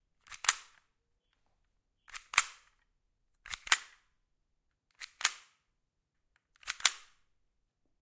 BB gun cocking 2
Pistol cocking : Clicking spring, sliding metal. Recorded on a ZOOM h6 handheld recorder. No processing.
reload, pistol, gun, handgun, OWI, cock